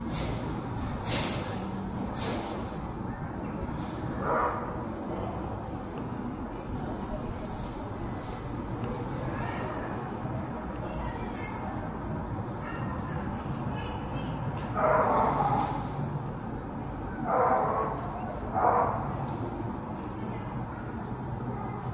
residental street amb
just down my street , perfect as ambient for outdoor dialog (stereo)
exterior, quiet-street, stereo